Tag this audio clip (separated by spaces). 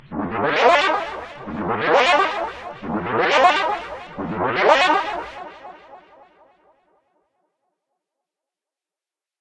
unearthly bizarre pro technica dreamlike